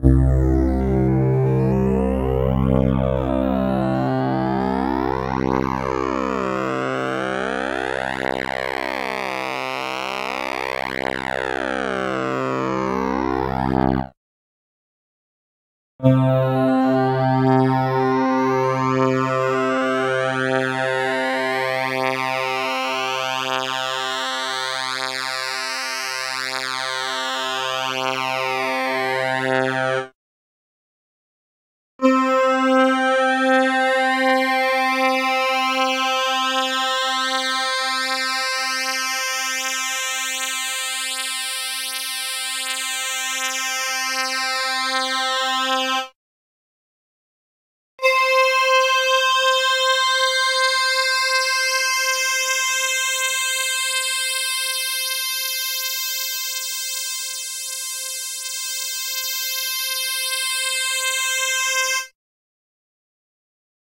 EVOLUTION EVS-1 PATCH 039
Preset sound from the Evolution EVS-1 synthesizer, a peculiar and rather unique instrument which employed both FM and subtractive synthesis. This harsh sweeping sound is a multisample at different octaves.